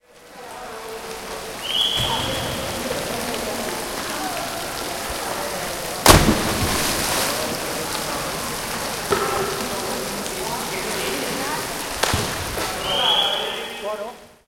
piovono pietre
a dive from 10 mt platform recorded in piscina cozzi, milano.
ripdive, dive, platform